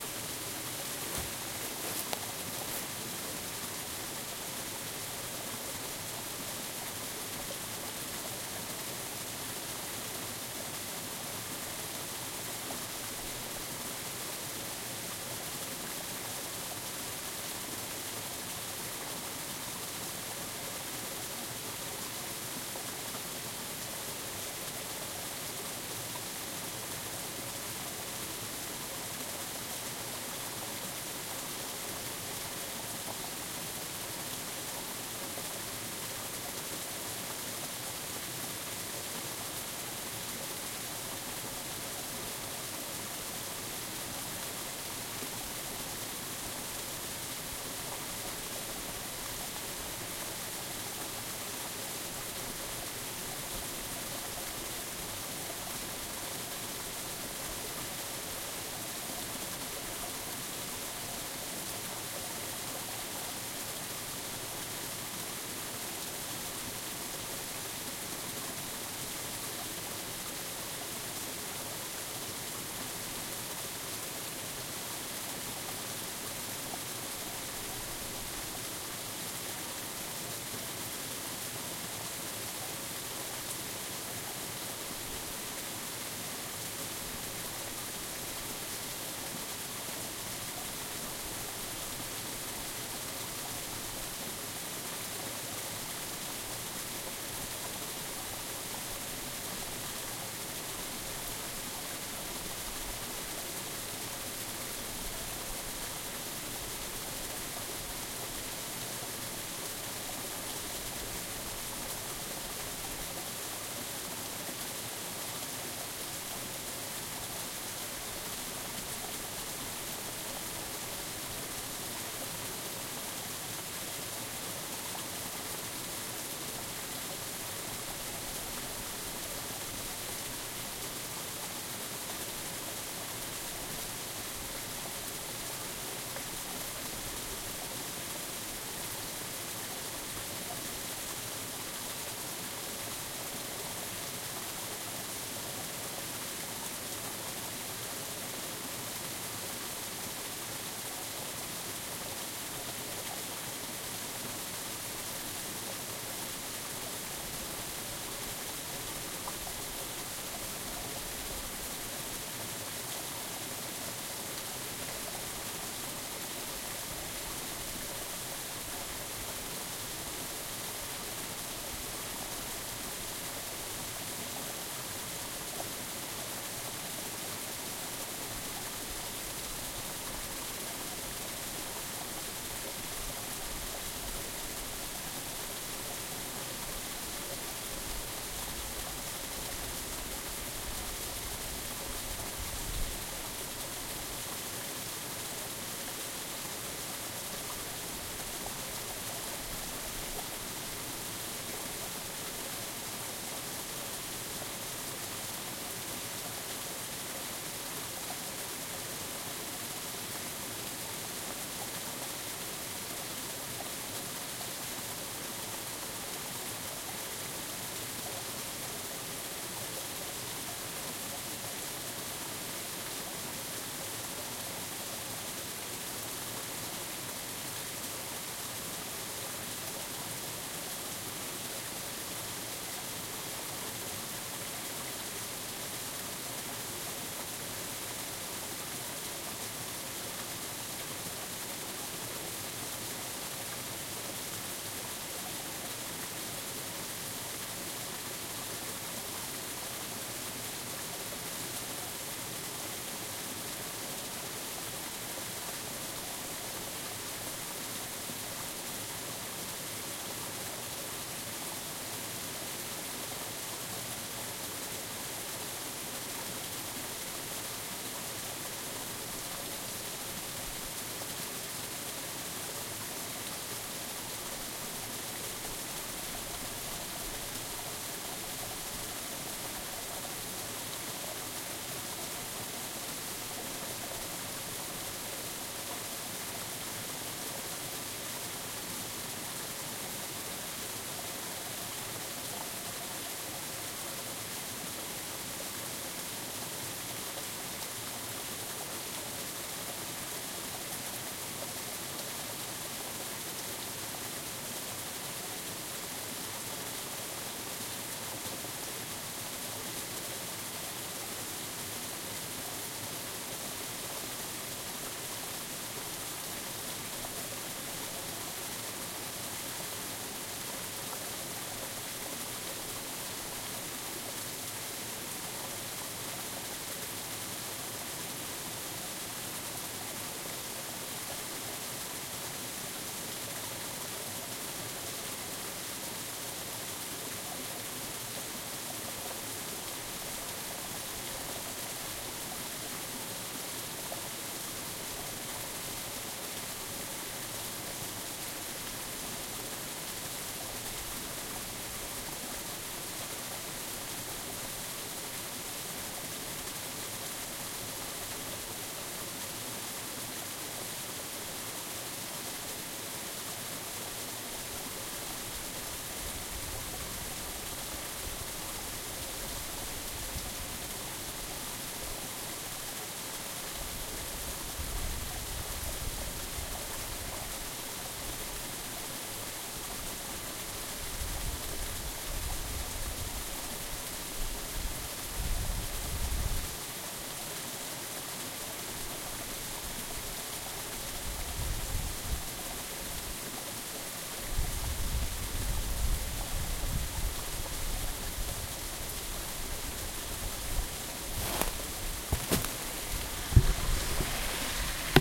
the sound of big stream in the winter mountain forest - rear
big-stream, waterfall, winter-forest, river, field-recording